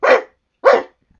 Dog Barking
By placing my hand over my mouth I instantly have a compressor that distorts any air or sound in my mouth.
All I do then is, with my mouth open, "hoot" or yell in short sharp bursts to make it sound like a dog.
acting, actor, barking, compression, dog, fake, vocal, voice, voice-acting